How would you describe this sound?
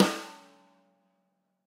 Drumkit using tight, hard plastic brushes.